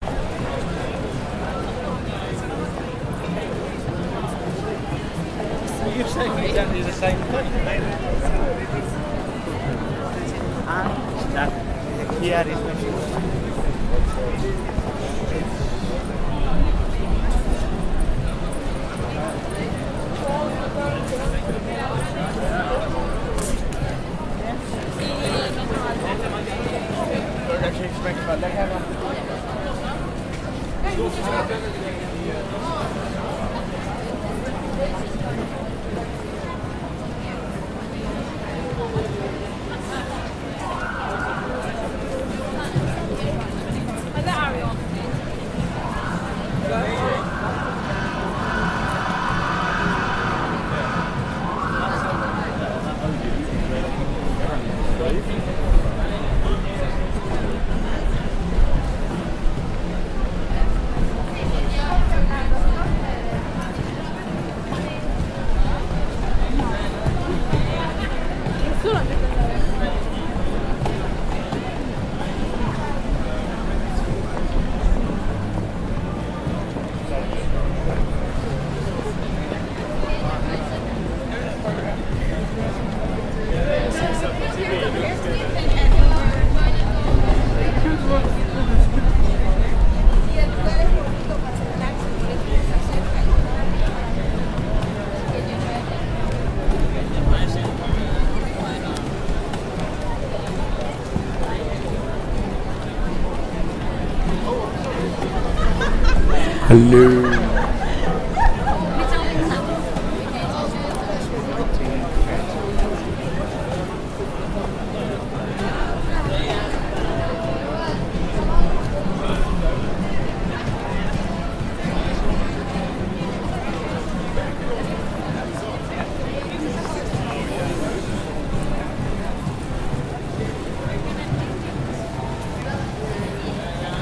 130926-006 North East Corner of Leicester Square
Sept 2013 recording of traffic at Leicester Square, London.
Part of an architectural student project investigating the city.
chatting, water